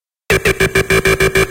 An original sound using a combination of 4 different native instruments synths. Enjoy!
Sound heard in this song!